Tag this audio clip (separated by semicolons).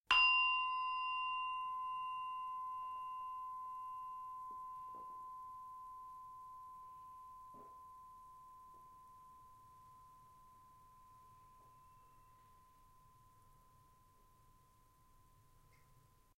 tibet; campane; temple; bell; monastery